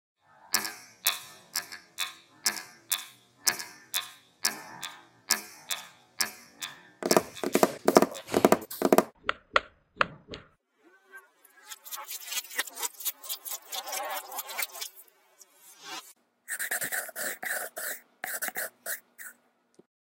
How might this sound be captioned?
This track contains 5 sounds. The first is slowed down, but keeps the original pitch. The second crossfades in and is cut and spliced. The third sound has a lowered pitch, but maintains it's original speed. The fourth is reversed and has a raised pitch, but a maintained speed. The fifth is sped up and the pitch is not maintained (so it's raised itself). These manipulations were made using the software "Reaper". The original sounds were created by tapping a pen on a table, swishing water in a water bottle, tapping a metal table and by scratching the microphone. This was recorded with the built in microphone on a macbook pro and edited on reaper.